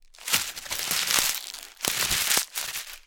A sheet of paper is scrunched up into a ball in this sound recording